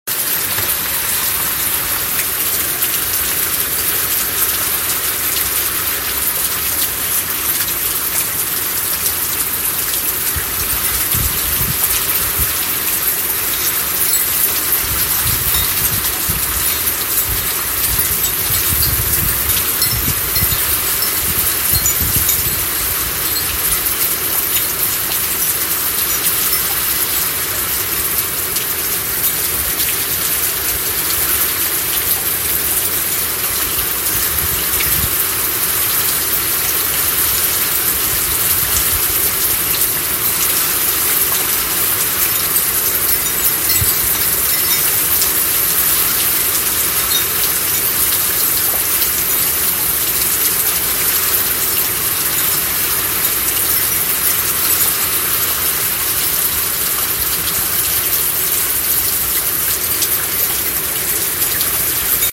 Rain Wind and Windchimes
Truly free sounds with NO strings of any type attached, are almost impossible to find.
Use...enjoy...!
Recorded during a summer rain storm out on my back patio.